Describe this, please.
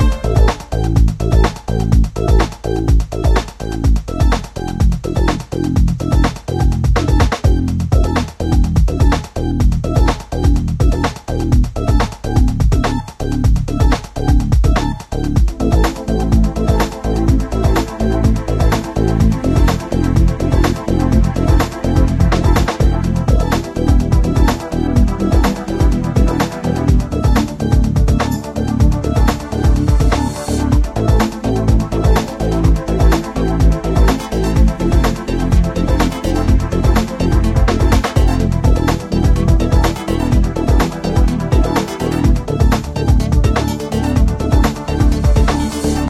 Loop InterstellarHero 05
A music loop to be used in storydriven and reflective games with puzzle and philosophical elements.
game, gamedev, gamedeveloping, games, gaming, indiedev, indiegamedev, loop, music, music-loop, Philosophical, Puzzle, sfx, Thoughtful, video-game, videogame, videogames